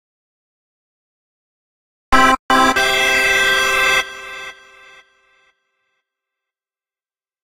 A quick little soundbite representing a victory or triumph. Created using free tools in GarageBand.